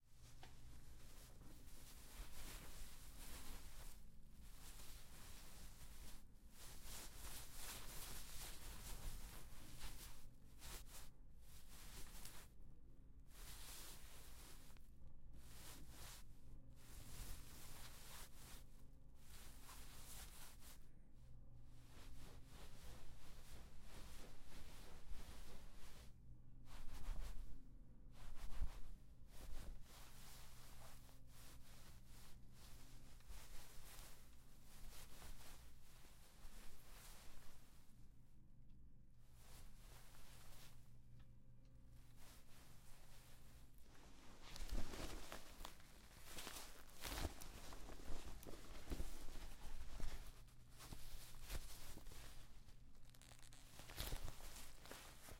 foley, movimiento de tela o ropa.
cloth, clothes, clothing, fabric, shirt